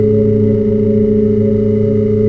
breaking apart
The sounds in this pack were made by creating a feedback loop of vst plugins in cubase. Basically, your just hearing the sounds of the pluggins themselves with no source sound at all... The machine speaks! All samples have been carefully crossfade looped in a sample editor. Just loop the entire sample in your sampler plug and you should be good to
go. Most of the samples in this pack lean towards more pad and drone like sounds. Enjoy!
atmosphere, pad, electronic, feedback, generative, loop, processed, drone, ambient, saturated